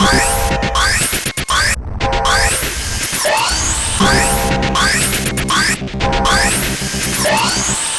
A funny robot loop mixed from my homemade sounds.
factory, industrial, loop, machine, machinery, mechanical, noise, robot, robotic, rythmic, sci-fi, weird